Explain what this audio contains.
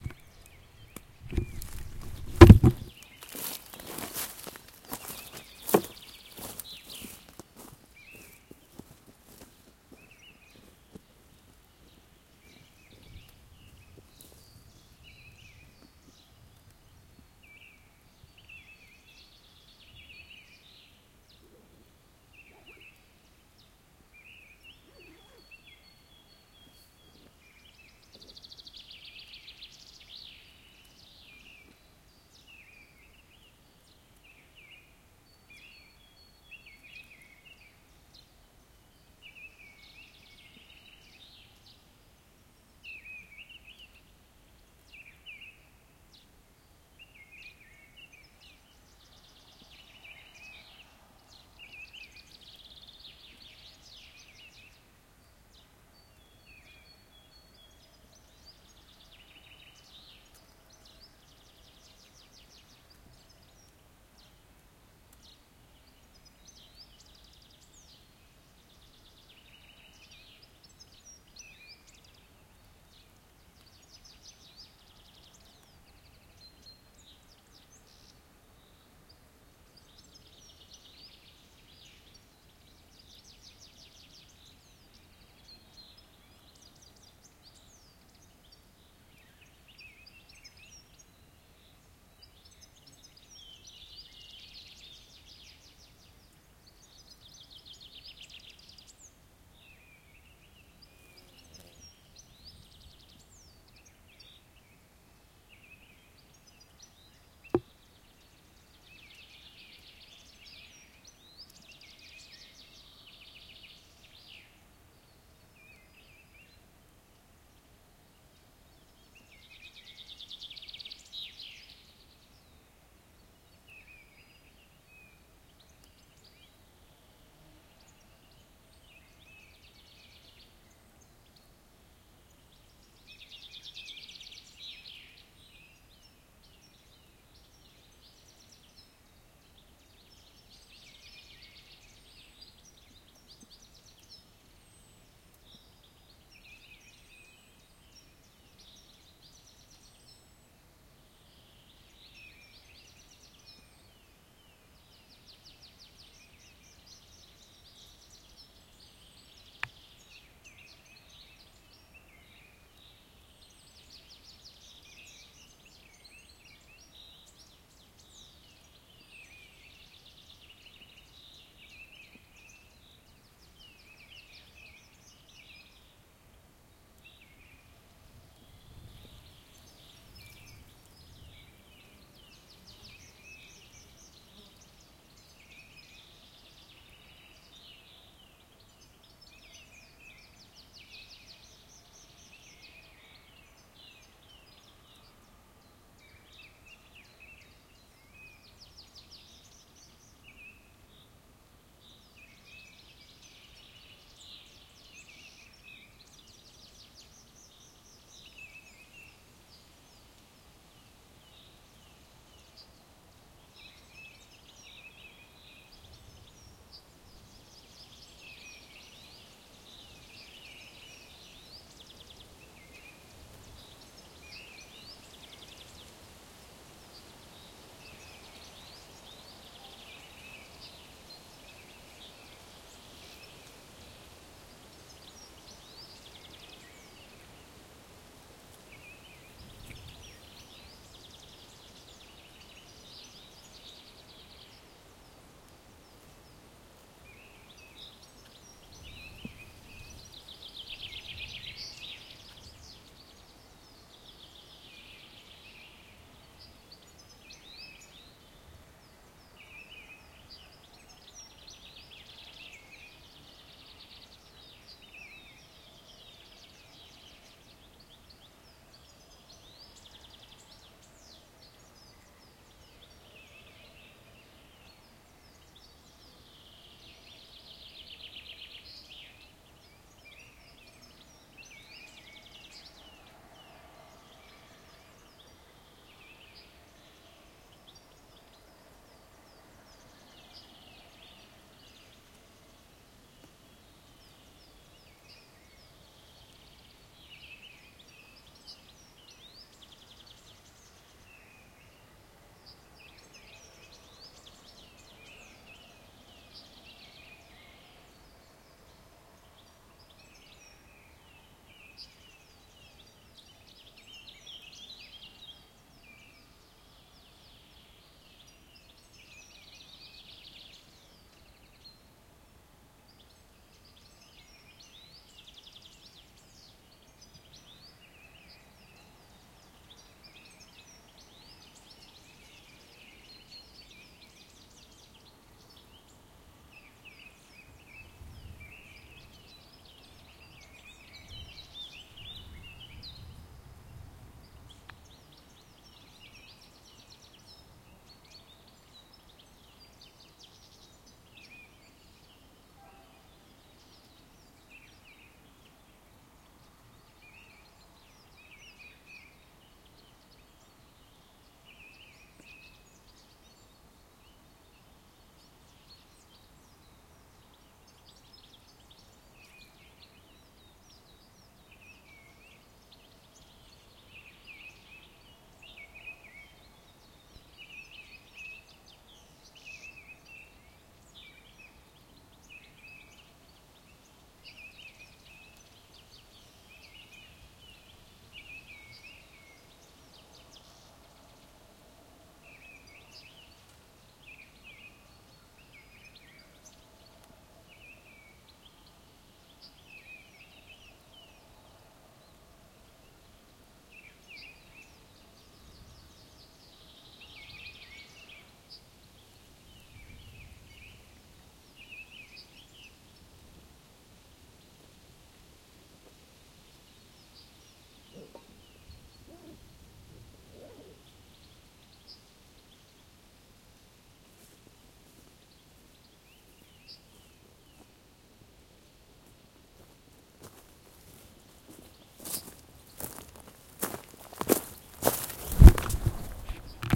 spring in the woods - front
spring in the woods
woods, nature, bird, field-recording, birdsong, birds, forest, spring